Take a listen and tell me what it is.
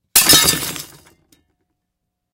large light bulb break
Large light bulb broken onto a concrete block over a plastic tub
Recorded with AKG condenser microphone M-Audio Delta AP
crash, glass, glass-break, light-bulb, smash